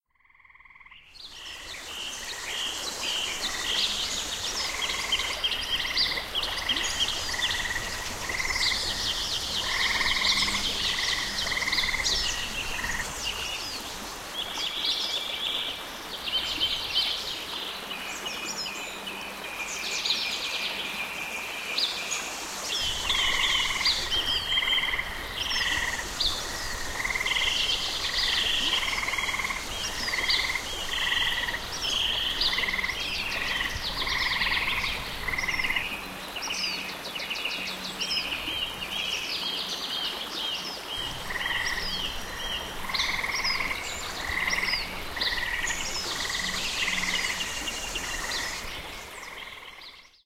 Ambient Forest Soundscape
I just borrowed some sounds from here so I thought I'd put them back in a more convenient form. Check it, it's a forest. A solid 5/10. Bye.
mix, outside, swamp